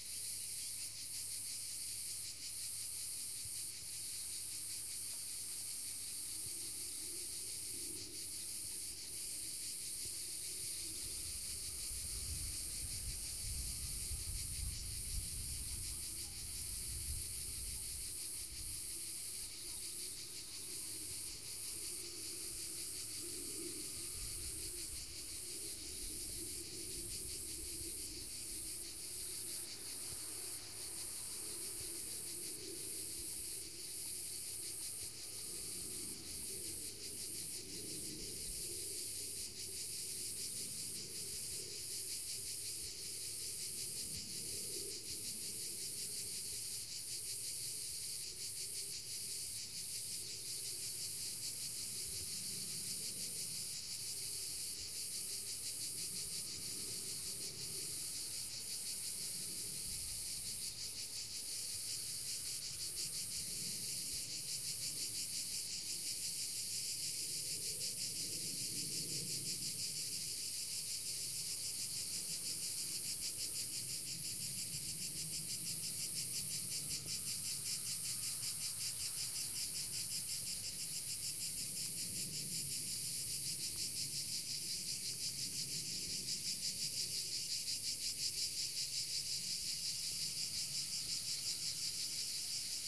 Tuscan countryside cicadas 02
ambience, countryside, cicadas, tuscan